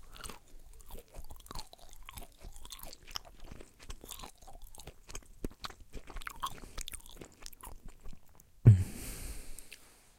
This sound is "grosser" than a porn scene. Too much fluid. Actually this sound really shows off the noise in the small diaphragm condenser compared to the large. You can easily hear the noise on one of the channels over powers the other. Again, this for the experiment of hearing the noise in mics and preamps.